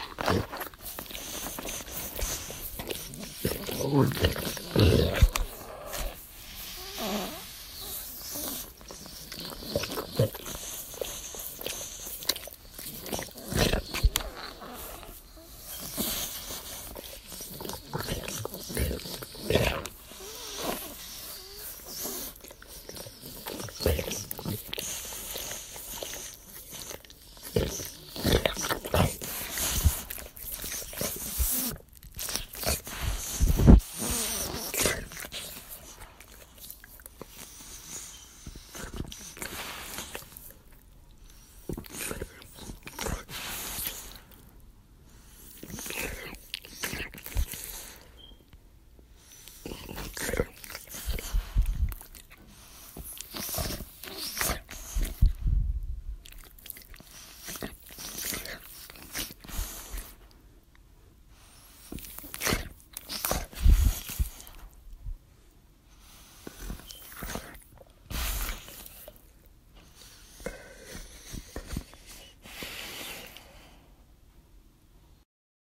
Bull Dog Licking Himself 2
My old english bull dog licking himself, recorded up close. Very good for gross monster sounds.
big, breathe, breathing, bull, close, creature, deep, dog, gross, horror, licking, monster, pet, up